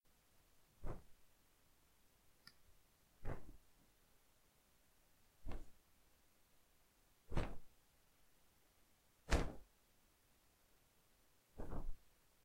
sonido sabana moviendose
free sound, efects, sabana moviendose en dormitorio